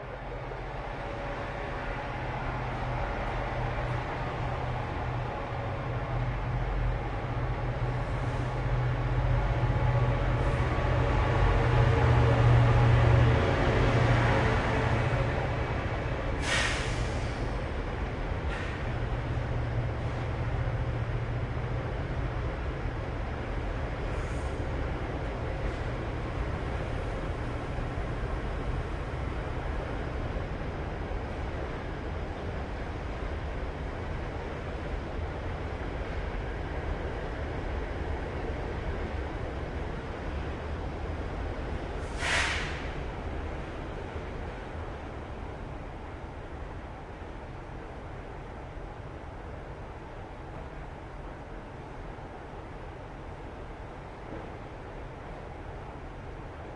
augo8 Doncaster train
General station ambience and train sounds at Doncaster station in Yorkshire, England.
field-recording, platform, railway, train